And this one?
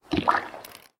Another recording of water bubbling through wet coffee grounds, makes a very satisfying bubbly sound. Not as harsh as my earlier recording, there's no slapping noise at the end.
drop, goop, ooze, splish, swamp